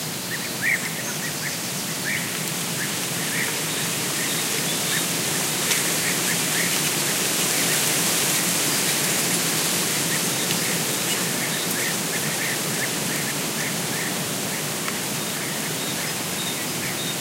20060328.eucalyptus.wind
wind shaking the leaves of an Eucalyptus tree. Beeaters in background / viento moviendo las hojas de un Eucalyptus
nature, leaves, wind, field-recording, tree